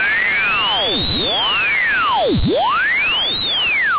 A high-frequency electronic discord tone (sweeping around high frequencies) suitable for ringtones and annoying dogs.
Also suitable as a sound effect for 'sweeping the dial' on shortwave radio.
dirty, ringtone, radiophonic, shortwave, sweep, radio, noise, high-frequency, discord, tuning, annoy-dogs, bat, electronic